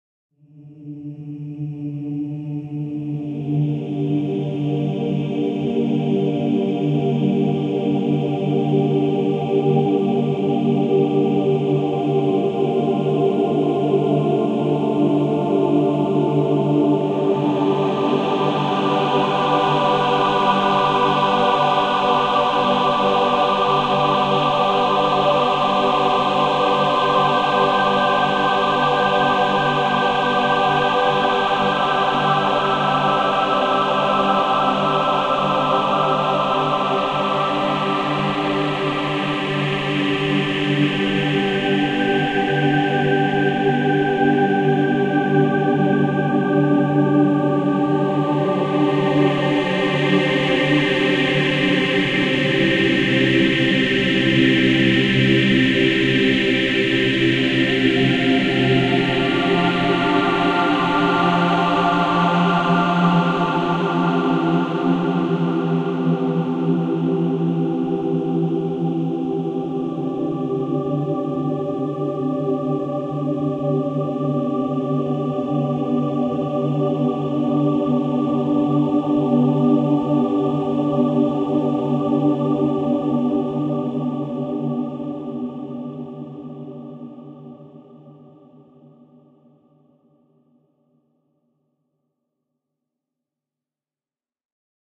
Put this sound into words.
gates-of-heaven
Created using Kontakt 4 choirs and ValhallaShimmer reverb